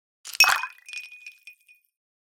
Ice Cubes Poured Into Drink
Ice cubes dropped into a glass of water
pour, ice, clink, cold, drink, melt, liquid, beverage, glass, crack, water, cubes